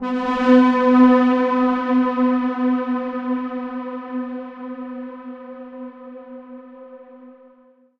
SteamPipe 7 DarkPad G#4
This sample is part of the "SteamPipe Multisample 7 DarkPad" sample
pack. It is a multisample to import into your favourite samples. A
beautiful dark ambient pad sound, suitable for ambient music. In the
sample pack there are 16 samples evenly spread across 5 octaves (C1
till C6). The note in the sample name (C, E or G#) does not indicate
the pitch of the sound but the key on my keyboard. he sound was created
with the SteamPipe V3 ensemble from the user library of Reaktor. After that normalising and fades were applied within Cubase SX & Wavelab.